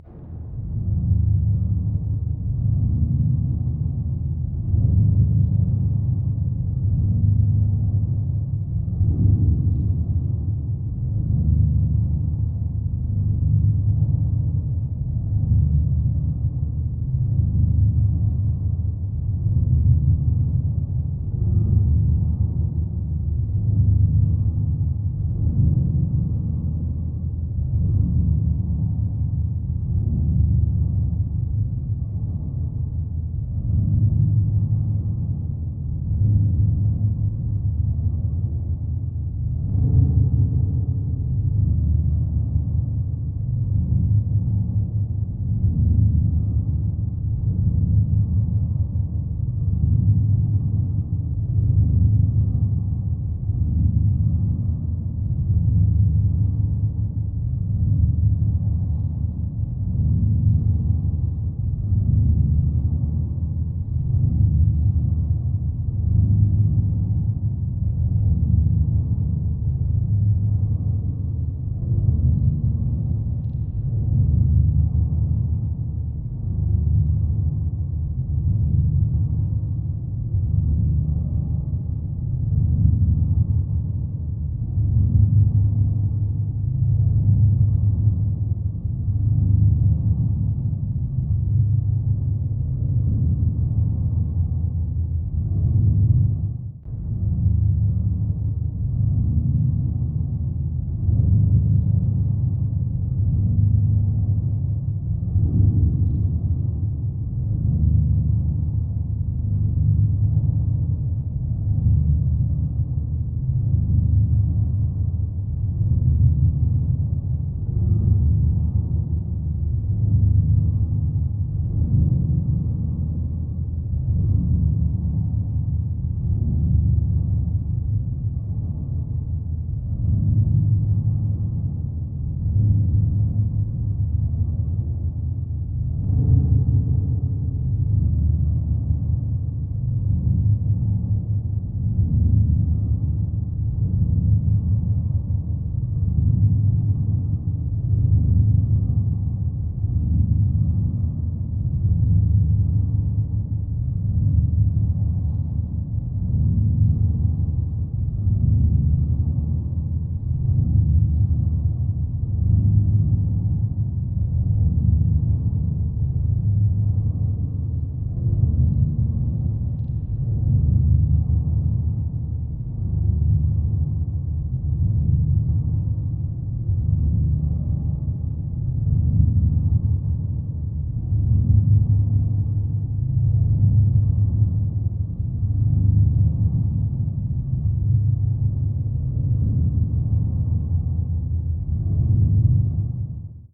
ship interior
A soundscape for a large, thrumming ship, perhaps adrift in deep space... Made from a recording of my cat purring, with lots of reverb added and a pitch-shift.
ship, space, spaceship, sci-fi, atmospheres, SciFi